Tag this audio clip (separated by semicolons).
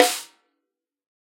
1-shot; drum; multisample; snare; velocity